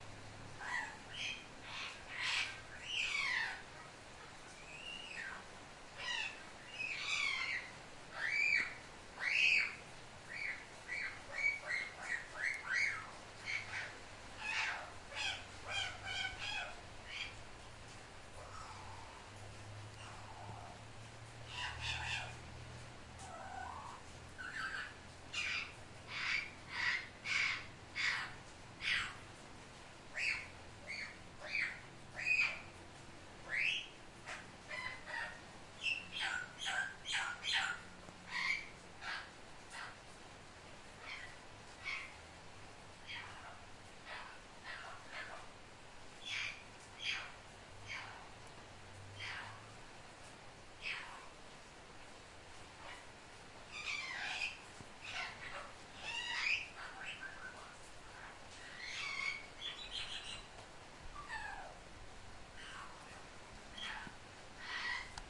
Parrot and rain
Parrot on the rain
bird, field-recording, parrot, rain